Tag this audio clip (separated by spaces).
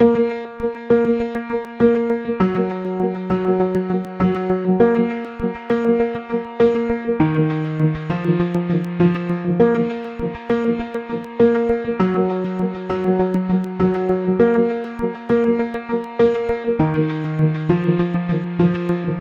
100-bpm loop piano